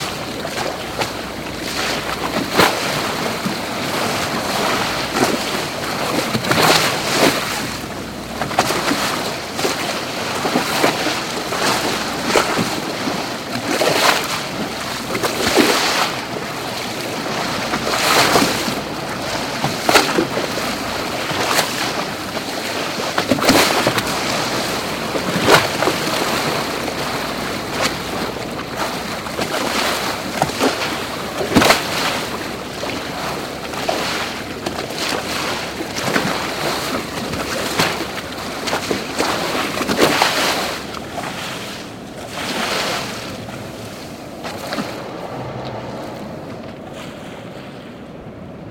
Ocean waves hitting bow of moving boat.
Ocean water splashing against the bow of a slow moving boat on the open ocean in the Pacific Ocean near San Francisco.
boat, ocean, splashing, water-splashing